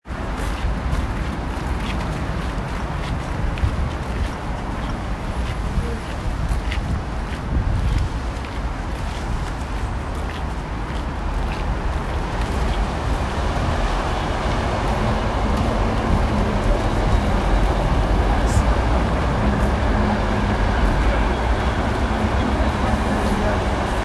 overpass in brussels